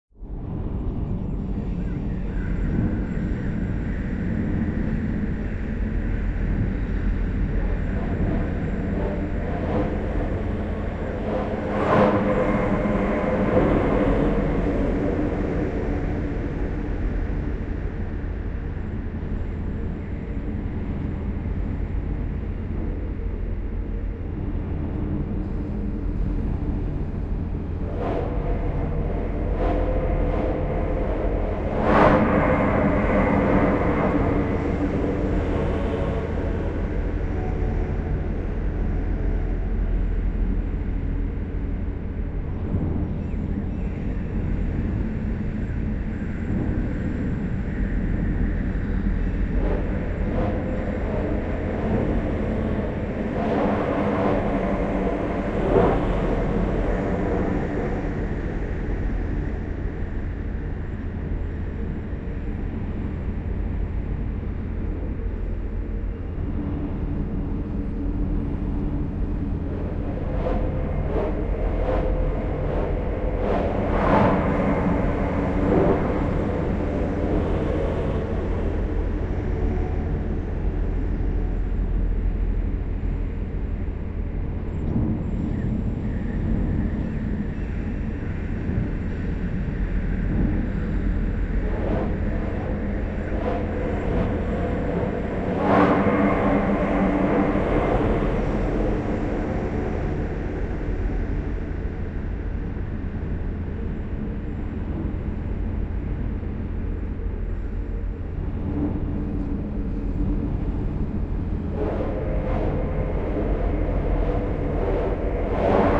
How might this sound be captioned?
Dark Scape Temple